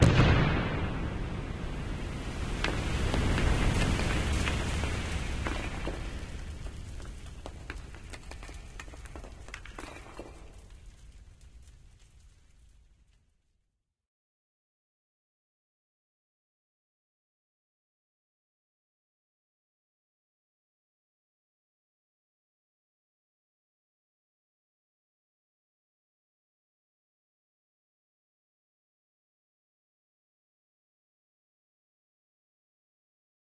Gas explosion and tunnel collapse in the mine. I created this sound for the short film "Oltreluomo".
Collapse, explosion